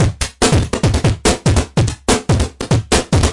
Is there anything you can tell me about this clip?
Hardbass
Hardstyle
Loops
140 BPM
Hardbass, BPM, Loops, Hardstyle, 140